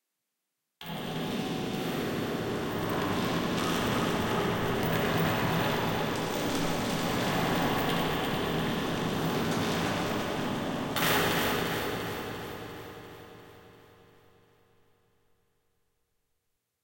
Dragging the Chains Wet
Dragging a large amount of chains, in reverb!